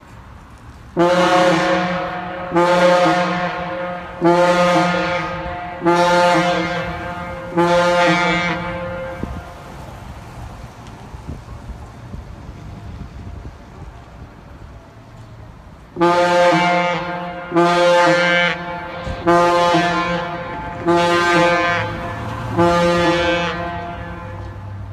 Gamewell Diaphone fire station horn
A series of blasts from a Gamewell diaphone air horn atop a volunteer fire station, used to call out volunteer firefighters.
air-horn, alarm, alert, diaphone, emergency, fire-station-horn, gamewell-diaphone, horn, warning